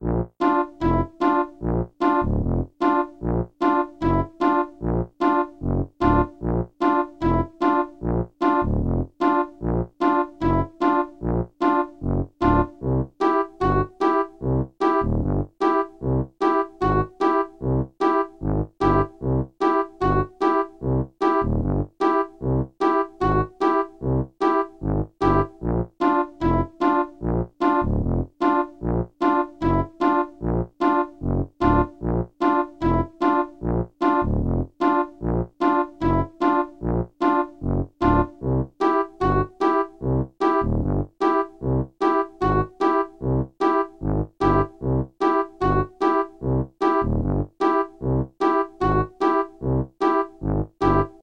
Short music for a funny or stupid scene. This sound is Loopable! Great for fail video or dumb stuff.